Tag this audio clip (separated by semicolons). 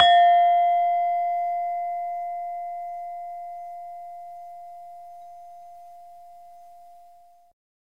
pelog; gamelan; saron